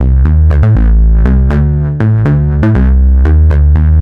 DeepBassloop4 LC 120bpm
Electronic Bass loop
electronic, loop